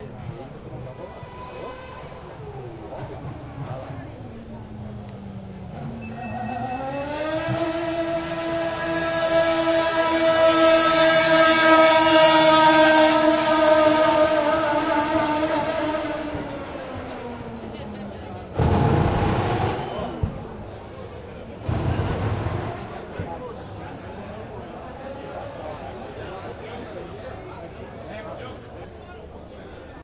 F1 BR 07 InBox Explota 1
Formula1 Brazil 2007 Race.
In-Box and Exploding engine.
Recorded at Grandstand B.Zoom4, lowgain
accelerating, car, engine, explosion, f1, field-recording, gear, h4, racing, revving, vroom, zoom